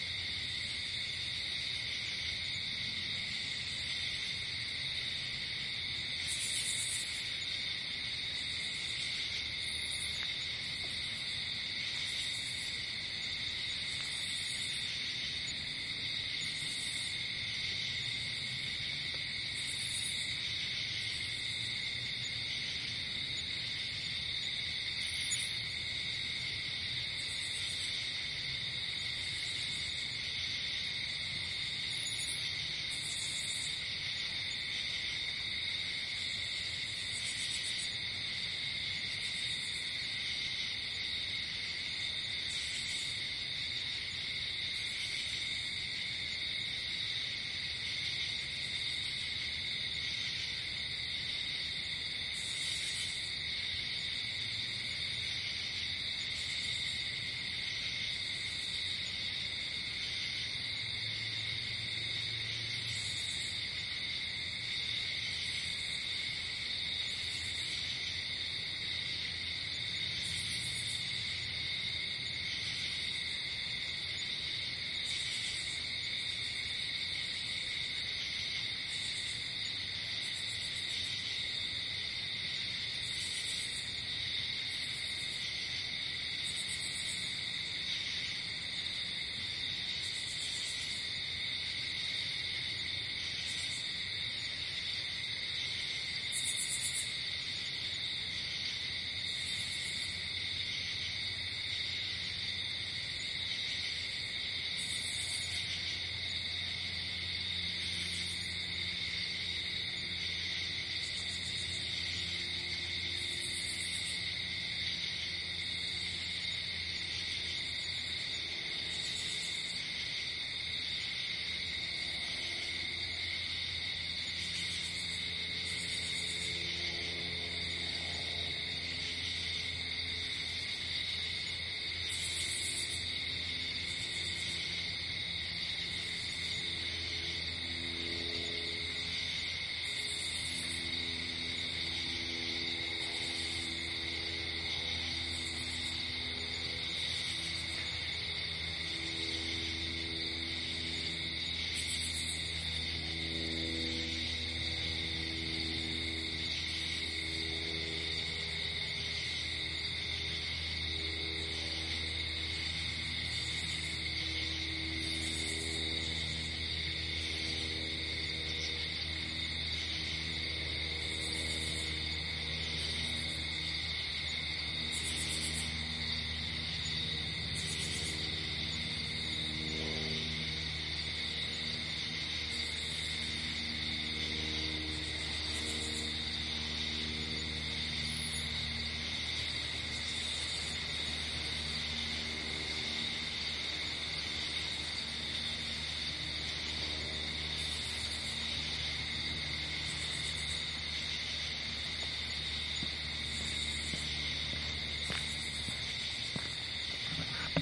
Night Woods
This immersive soundscape, full of crickets and katydids was recorded at night time deep in the woods of French Creek State Park in Eastern Pennsylvania in late summer early fall.
ambience, summer, nature, nighttime, ambient, evening, general-noise, ambiance, insects, night, field-recording, bugs, forest, crickets, katydids, woods